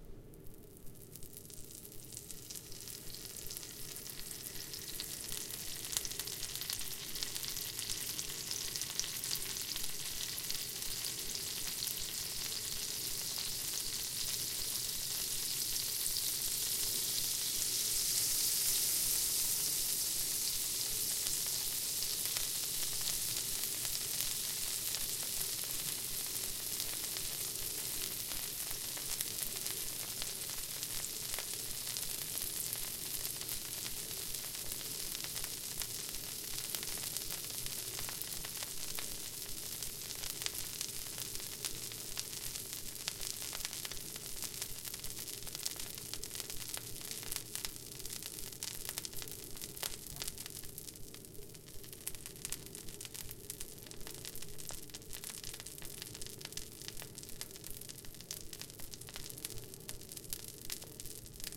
A frying pan (and exhaust fan) recorded with a Zoom H1.
cooking, food, frying, skillet, pan, searing, kitchen